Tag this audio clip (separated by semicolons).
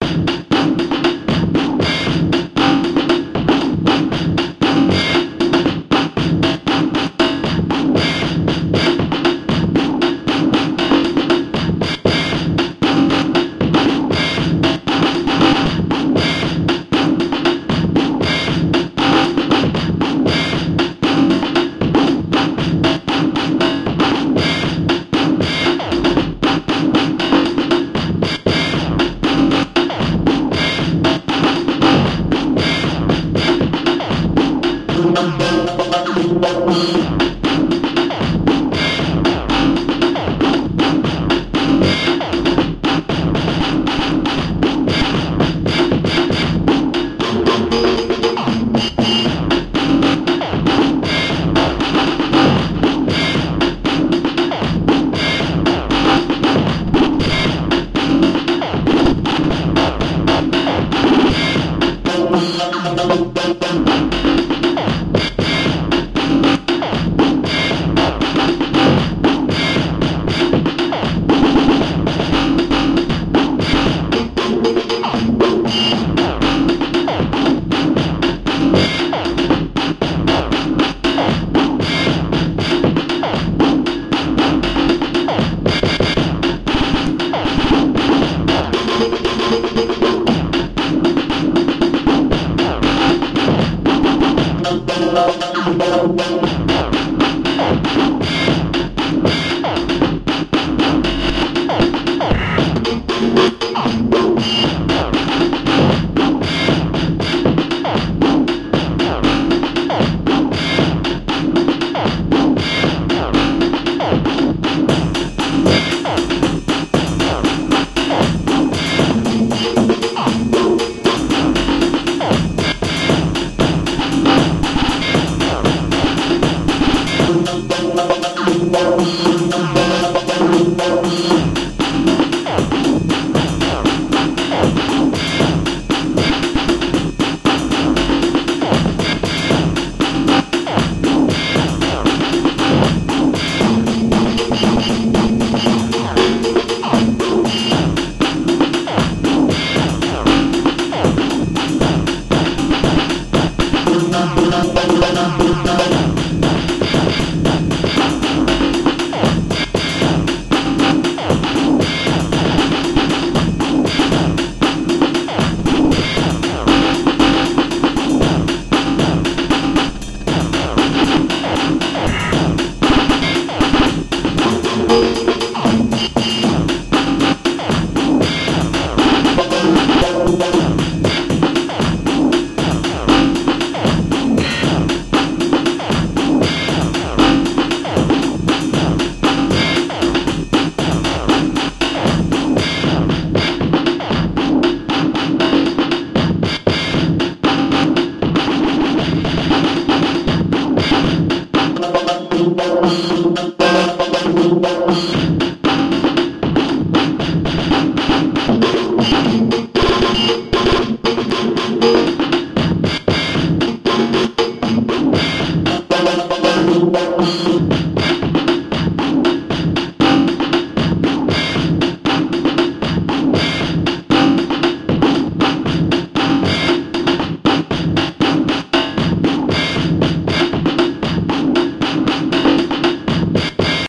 drums; drumloop; beat; cleaner; breakbeat; quantized; groovy; drum-loop; rubbish